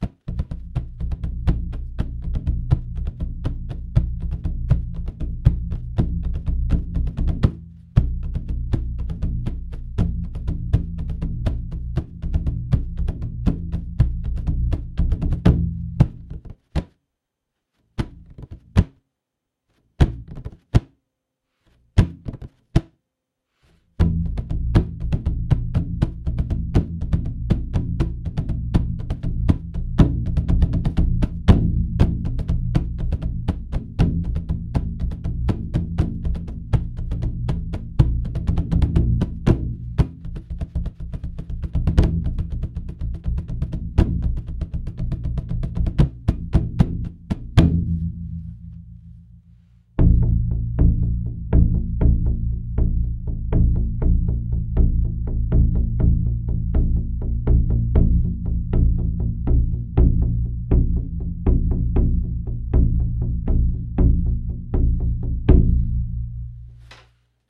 For this I mixed a low (-12 semitones) and a normal (unedited) version of the 17 inch drum. Playing a beat at 120 bpm. No reverb. 1 rhythm by hand, 1 with fingers and hand, and one with mallet.
Recorded with a SM58 in a sound-treated room.